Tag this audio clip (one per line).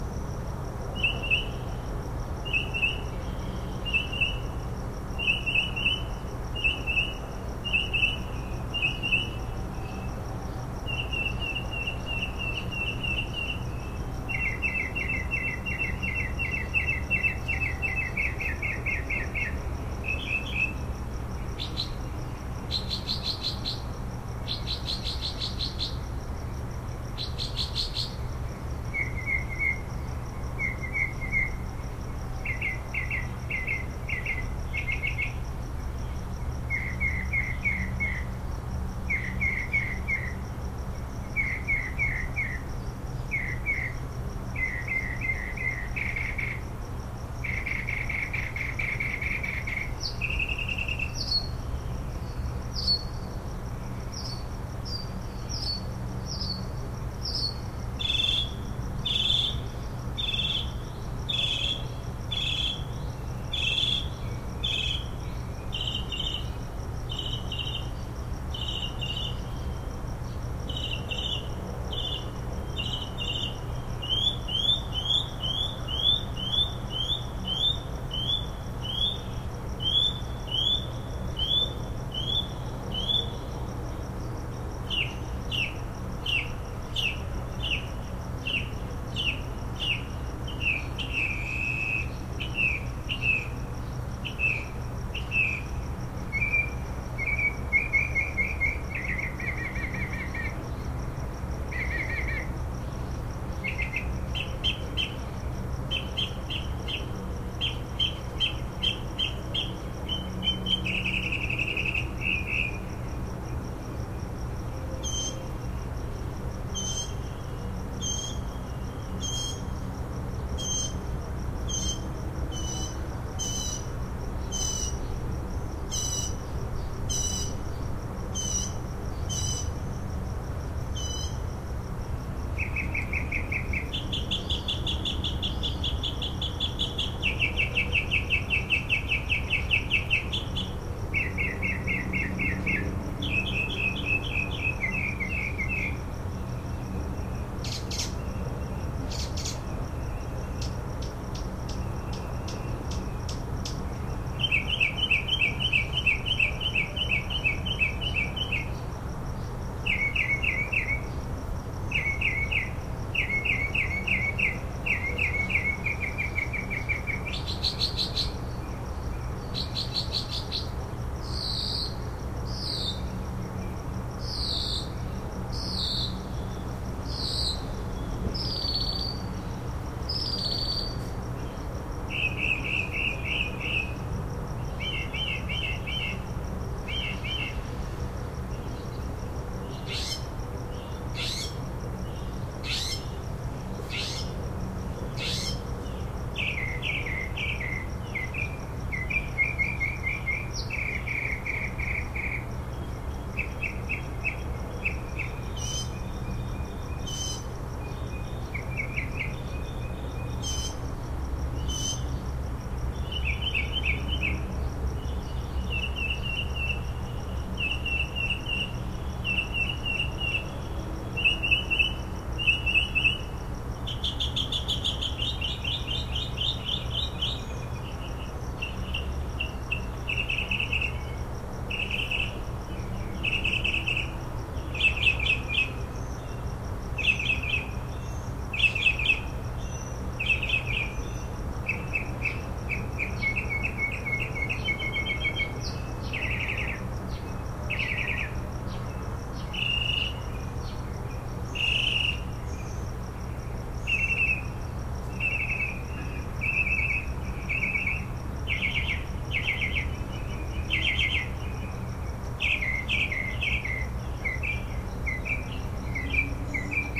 bird
burbank
field-recording
nature
singing